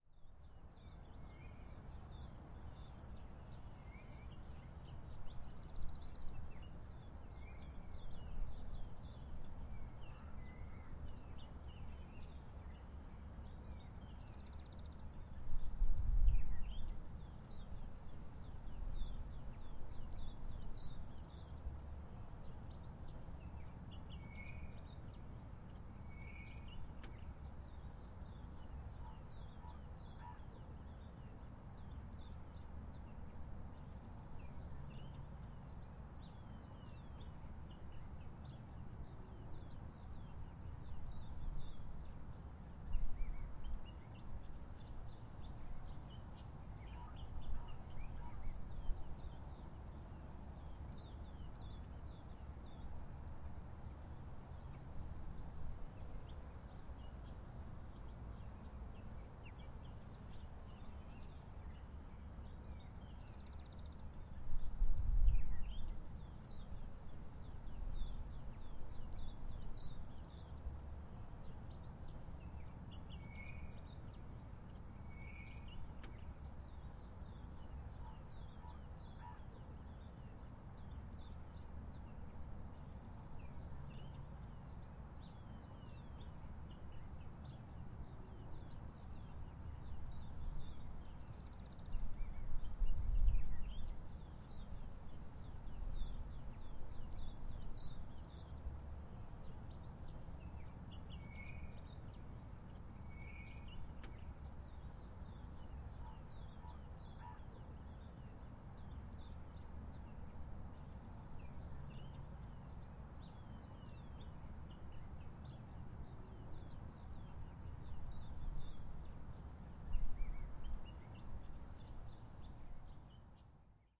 Ambience Nature
Recorded with a zoom H6. A nature recording with different birds and animal sounds.
ambience, animals, birds, field-recording, nature, OWI, trees